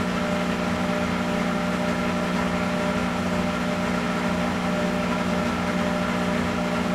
washing machine wash3 cycle2
During the wash cycle.